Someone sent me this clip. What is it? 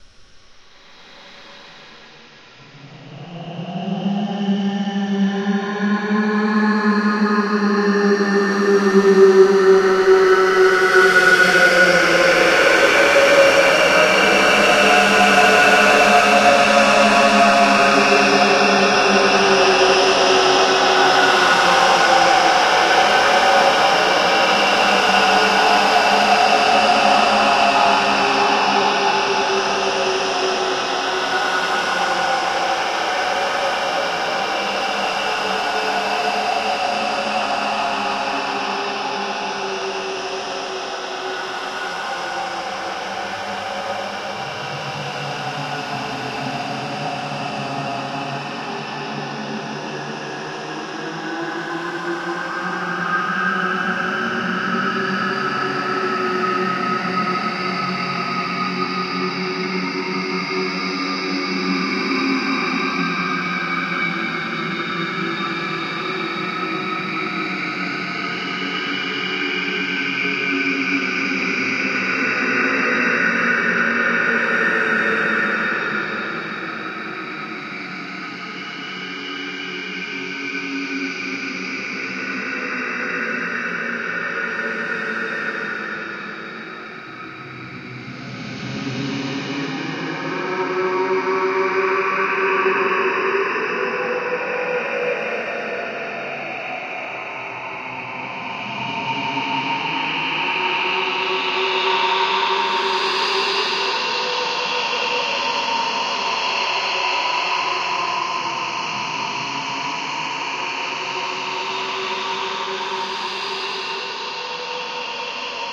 ambinet hell
ambient, anxious, background-sound, creepy, drama, haunted, hell, spooky, terrifying, terror